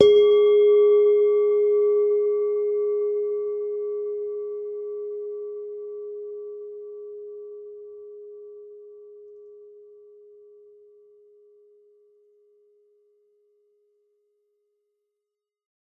mono bell -6 G# 16sec
Semi tuned bell tones. All tones are derived from one bell.
ping, bell, dong, ding, bong, bells, bell-set, bell-tone